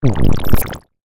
One in a series of short, strange sounds while turning knobs and pushing buttons on a Synthi A.